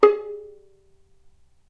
violin pizz vib G#3
violin pizzicato vibrato
pizzicato, vibrato, violin